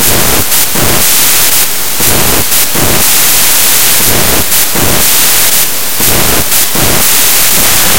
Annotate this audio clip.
223457 wrong import settings in Audacity 1
Warning: LOUD UNPLEASANT SOUND
Intended only as an example. Read description.
A Little warning to anyone playing with exporting sounds into paint programs changing those sounds and importing back as sound:
Make sure firdt you find the settings to convert the sound to image and convert back to sound in your choice of sound and paint programs.
If you do find a combination of settings that allows you recover the sound make sure you write them down.
If your import settings into your audio editor (I am using Audacity) are not right you get something like this sound.
Exported from Audacity as raw data and re-imported with the wrong settings.
sound-to-image, image-to-sound, processing, noisy, paintshop-pro